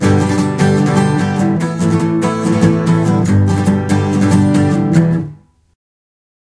acoustic flamenco imitation2
More imitation flamenco playing on Yamaha a guitar acoustic guitar while testing the Olympus DS-40 with a Sony microphone.
acoustic, guitar, flamenco